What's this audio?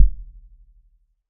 808 Deep kick
808 deep low kick
808, bass, beat, deep, drum, hip, hop, kick, low, percussion, rap, thump, trap